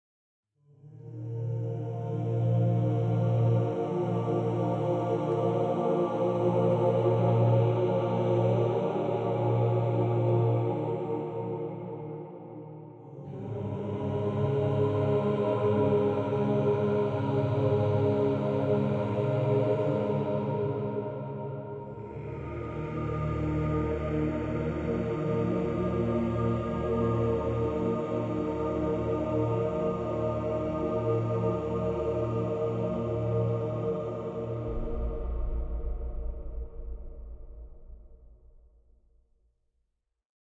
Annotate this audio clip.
Short eerie chorus
Short eerie choral 'sting' (want of a better term), can be added to if one wants. Enjoy
chant, choir, chorus, dark, deep, eerie, Gregorian, horror, human, male, octavist, reverb, spooky, vocal, voice